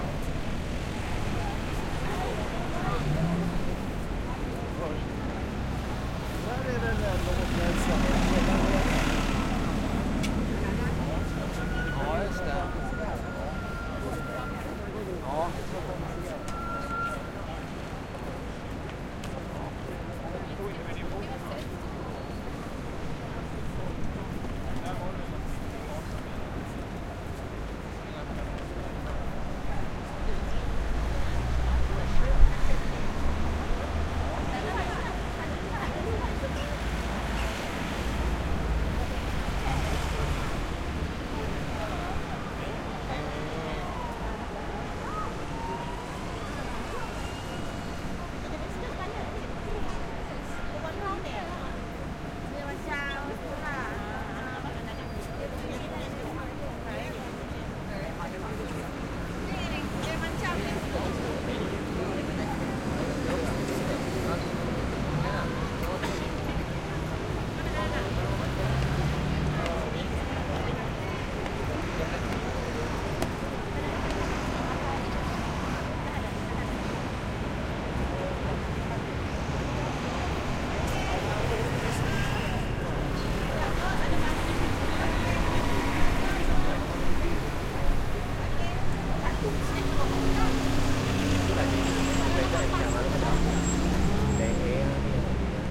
Piccadilly Circus Ambience
General ambience from London´s Piccadilly Circus. Recorded with the Zoom H6´ onboard stereo capsule.
ambience, Britain, Circus, city, England, field-recording, London, Piccadilly, UK, United-Kingdom, Zoom